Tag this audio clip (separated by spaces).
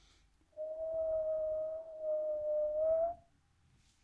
Foley Cares Random Nobody